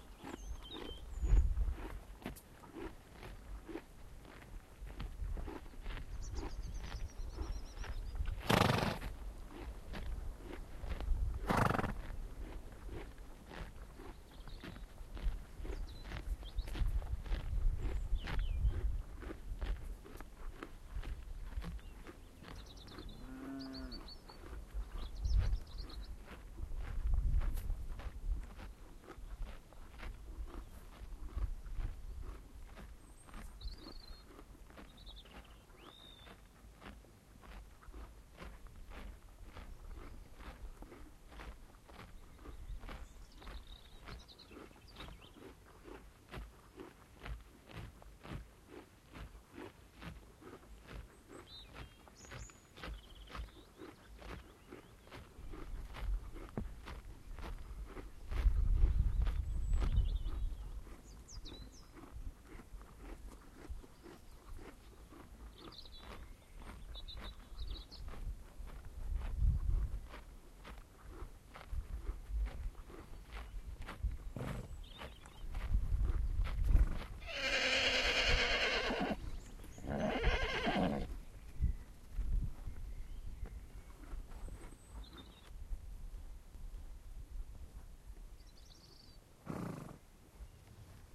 Pony eating grass, blowing through nose and neighing. You might hear a second pony eating. In the background some bird song, a cow, and some wind. These are called wild ponies but are really just free roaming on the moor. They belong to farmers.
wild-pony, cow, bird-song, dartmoor, hill-pony, Pony, neigh